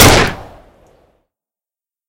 Single Gunshot 4.3
An improved version of my "Single Gunshot 4.2" SFX; this one isn't too meaty or filled with irritating reverb as this one, which I believe is also slightly more realistic. Created With Audacity.